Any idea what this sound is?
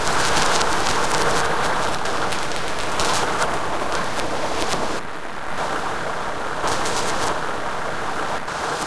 no bees, or wind, or water used in this, but it does sound like it, though. sound-design done in Native Instruments Reaktor and Adobe Audition.